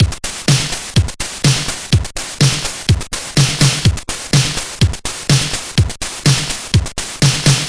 Atari XL Beat 2
Beats recorded from the Atari XL
Atari, Drum, Chiptune, Beats, Electronic